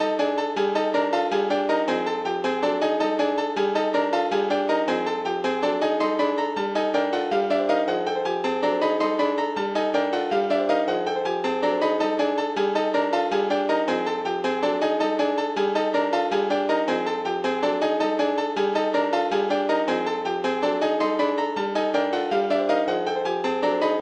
Broken piano loop (no music theory) for sick people
piano-loop, piano, 180-bpm, loop